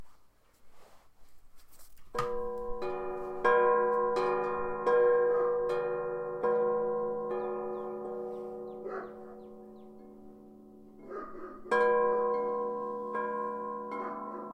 Handcrafted bells make beautiful sounds.